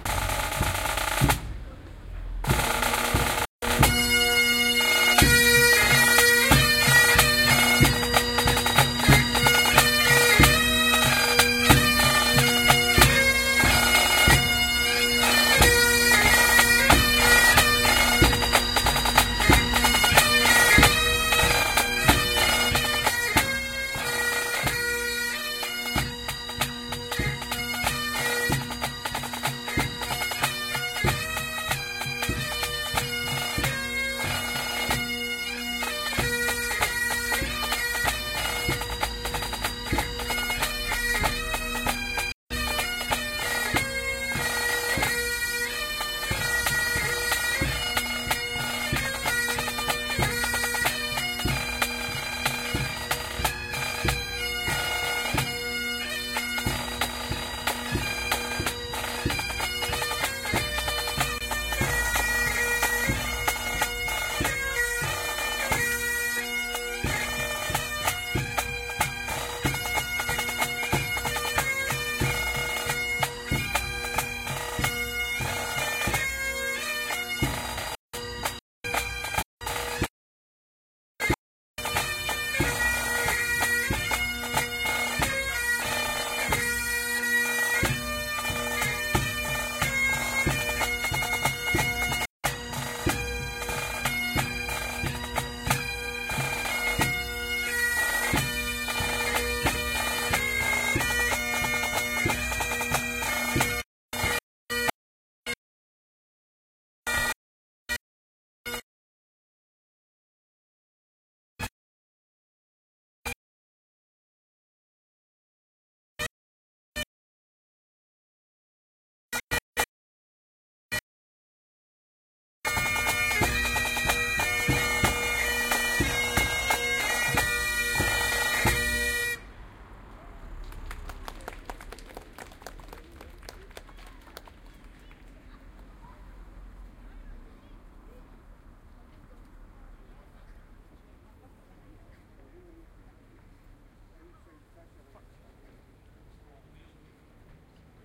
Bagpipes in Pitlochery
On the 7th of October 2007 the 10 km run took place in Pitlochry / Scotland.
At the end, there was some bagpipe music for the runners and the supporters and I managed to record it, standing quiet close to it. Very loud, very moving!
Soundman OKM II and Sharp Minidisk MD-DR 470H.
bagpipes, binaural, environmental-sounds-research, field-recording, music, pipeband, scotland